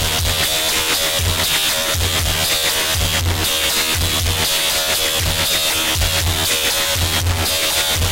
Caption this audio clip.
nasty beat with childish bontempi